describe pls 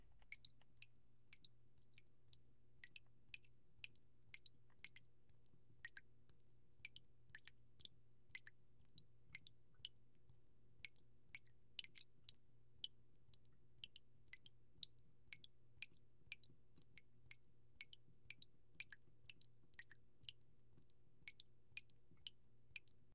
Water Dripping on Water
Water dripping into pool of water. Simulation of a faucet dripping.
bathtub, drip, dripping, faucet, sink, water